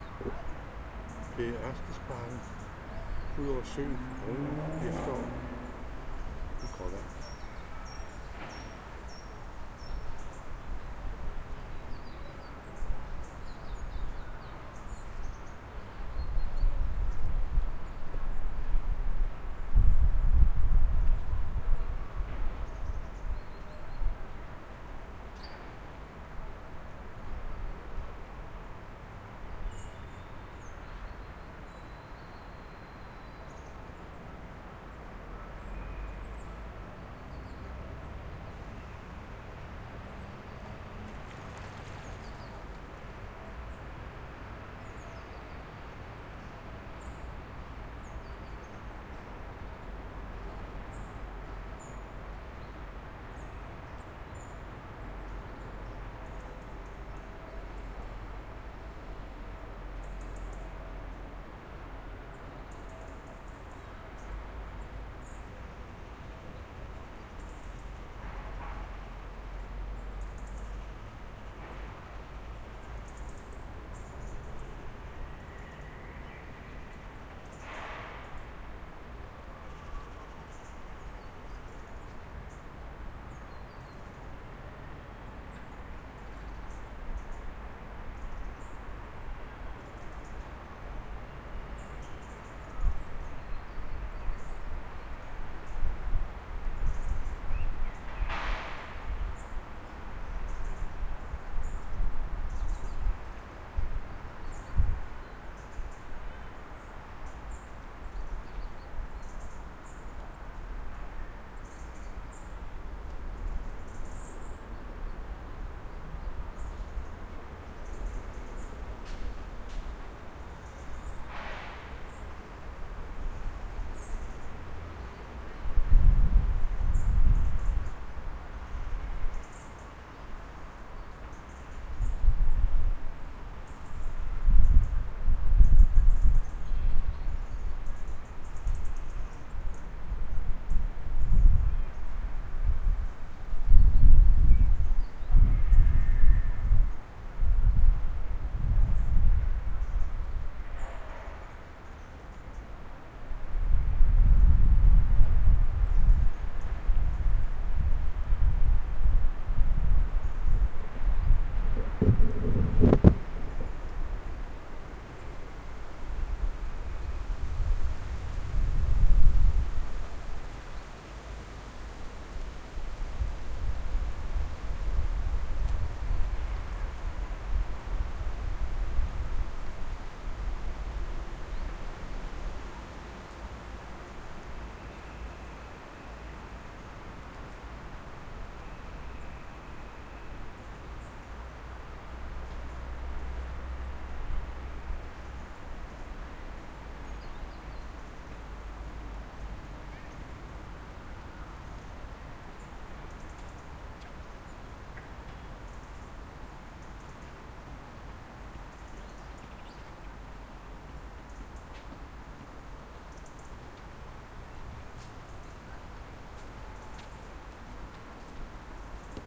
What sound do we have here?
City park Ørstedsparken lake distant traffic birds autumn 1
City, traffic, distant